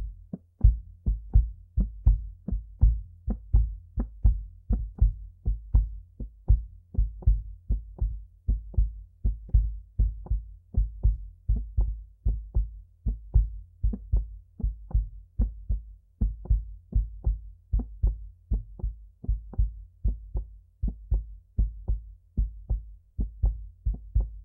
Heartbeat Foley
A foley of a heartbeat using a tupperware lid and a bass drum
bass, beat, blood, cardio, drum, foley, heart, heartbeat, kick, lid, pumping, tupperware